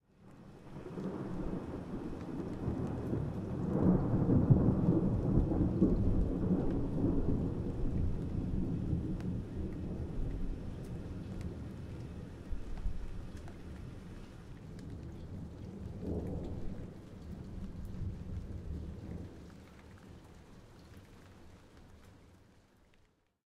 medium distance thunderclap 2
quite distant thunder sound